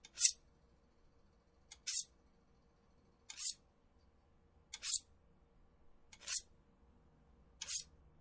Sonido grabado al momento de golpear un cuchillo contra otro.
golpeando, otro, Cuchillo